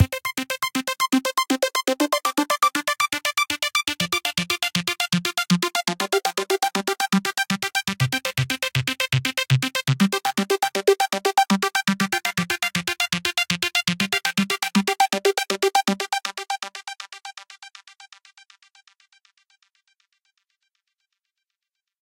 Happy Trance - Bass Chord Progression (Arp2) - 120Bpm
Part of the Happy Trance pack ~ 120 Bpm
These is an original, rhythmatic, catchy bass riff in arpeggio chords, ready to be looped & built upon